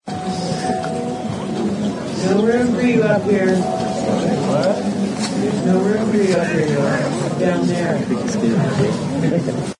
no room for you up here
An excerpt from a recording of a theater crowd: "there is no room for you here, what? there is no room for you here, you will have stay down there."
I can imagine the waiting room at the gates of heaven...
crowd, people, speech, field-recording